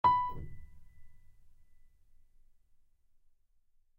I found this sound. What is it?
realistic piano tone
grand, real, piano, Acoustic, wood